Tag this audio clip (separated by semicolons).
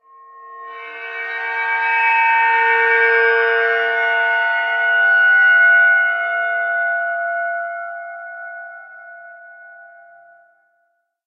percussion; cymbal; transformation